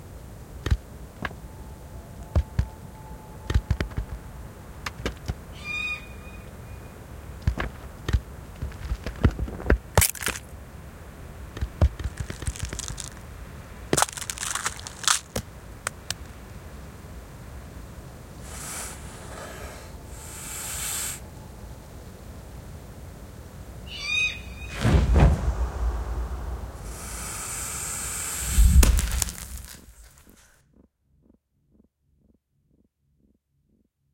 snatching snake eggs
a baby snake hatches only to find itself the meal of an owl
crack, snake, egg-snatch, egg, owl